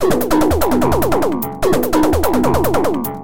So Convenient 2 Be A Robot
-Robot jerks on the disco floor
-The first intro & The last verse for now
-The enchanted chorus of robots
-Everything is well oiled
-The excited doorbell, (the last dance)
-Cyborgs waddle Clapping in their hands of steel
-The new hyper insane dance move
-So convenient to be a robot!
-When the sound increases, the heart never lets go.
It's great and did you listen to all the bass drum loops by #MrJimX (...) Thank you and... Ok for future collaborations.
P.S. : (Works In Most Major DAWS)
To buy me a coffee!
You incorporate this sample into your project ... Awesome!
If you use the loop you can change it too, or not, but mostly I'm curious and would like to hear how you used this loop.
So send me the link and I'll share it again!
Artistically. #MrJimX 🃏
clapping, MrJimX, club, Electronic, Dance, fx, sfx, robots, techno, Mechanical, strange, house, Sci-Fi, loop, sound, Futuristic-Machines, elements, hit, bounce, rave, trance, electro, Space